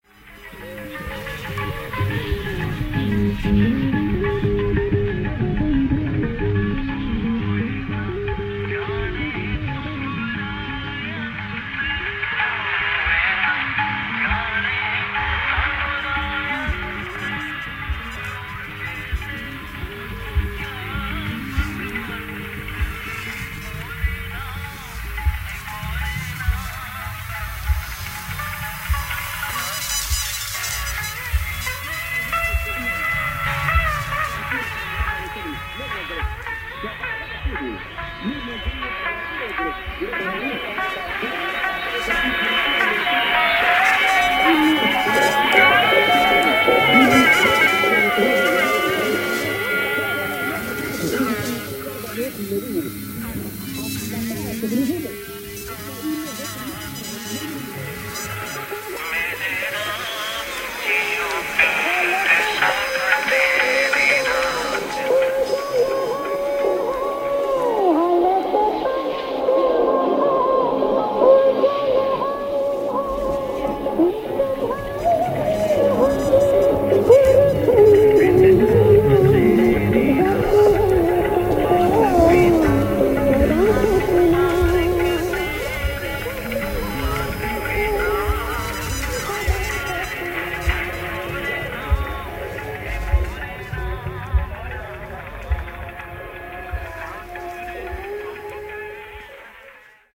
short wave radio noise
Radio noise on short wave. compilation of sounds